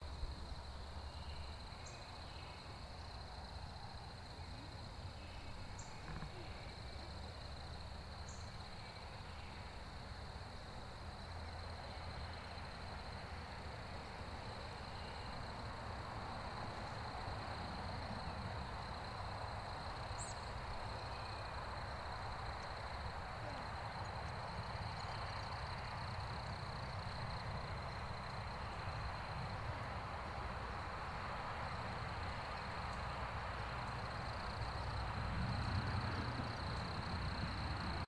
Birds Chirping at Night
Birds and crickets ambiance on a summer night
Recorded with a ZOOM H6 with Sennheiser shotgun mic
ambiance, birds, chirping, cricket, crickets, field-recording, forest, insects, nature, night, night-time, south, spring, summer